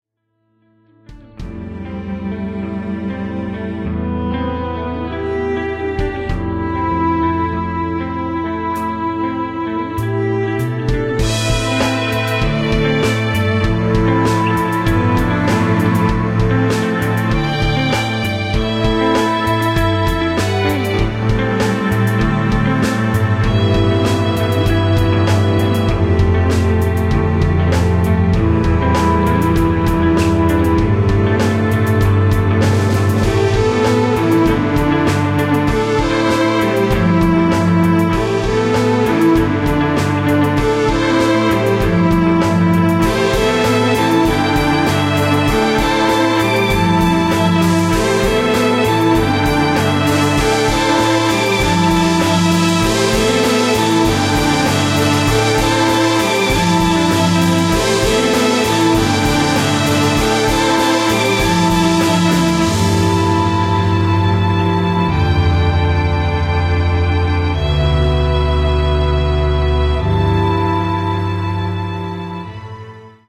Classical Rock
A powerful, emotive string driven rock / pop instrumental.
Trivia: Composed around 2011 for a British drama series pilot. Needless to say, it was not picked up.
guitar, emotional, strings, instrumental, violin, orchestra, buildup, classical, melancholic, tempo, string, drum, rock, electric, bass, pop, fast